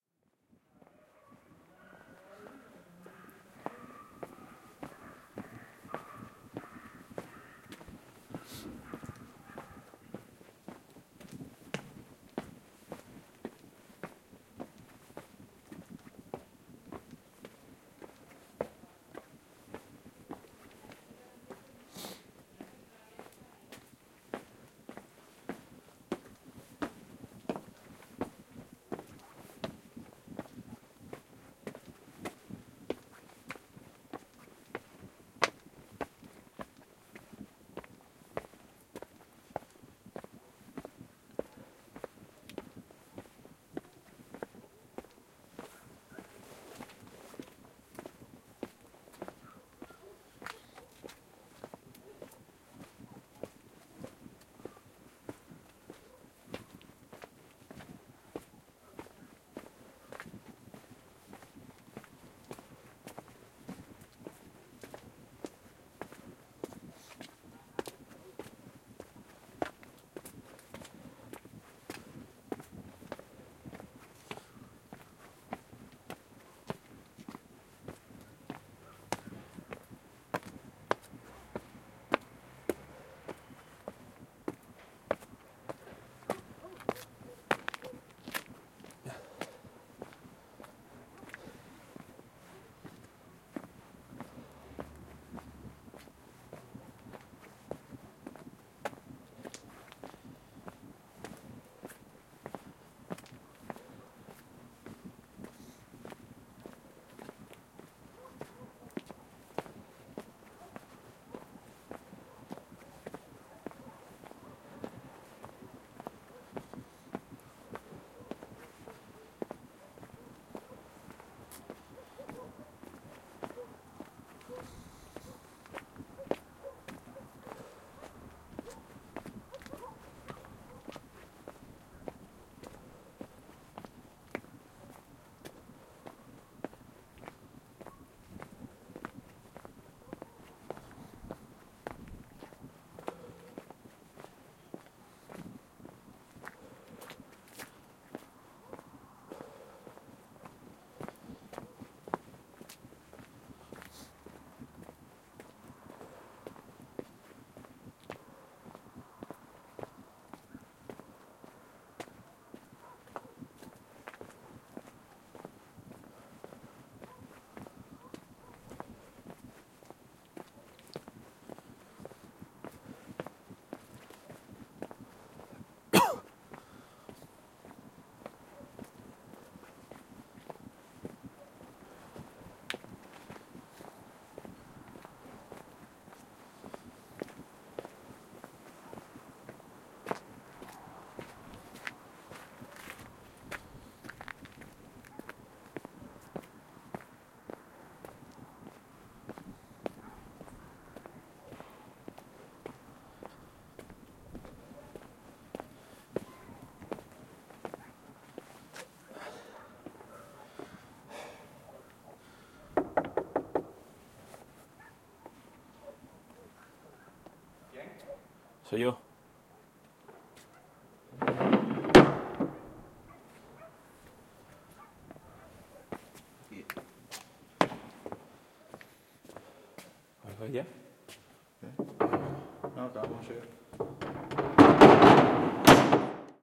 Alanis - Night walk to the Chapel - Camino nocturno a la ermita
Date: 22nd Feb 2013
In the night, I recorded my walk from the Town Hall Square to the Chapel. My friends were up there preparing some video-mapping work.
The geotag is set where I began recording.
Gear: Zoom H4N, windscreen
Fecha: 22 de febrero de 2013
Por la noche, grabé mi camino desde la Plaza del Ayuntamiento hasta la Ermita. Mis amigos estaban allí arriba preparando un trabajo de videomaping.
El geolocalizador está puesto donde empecé a grabar.
Equipo: Zoom H4N, antiviento
pajaros, breathing, soundscape, Spain, Espana, paisaje, naturaleza, Sevilla, grabacion-de-campo, nature, village, field-recording, pueblo, Alanis